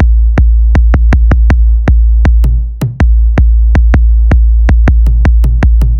808 kick loop 80 bpm or 160bpm drum & bass, sounds better downloaded ;)
160, 160-bpm, 160bpm, 80, 808, 80-bpm, 80bpm, bass, bpm, drum, kick, loop, thud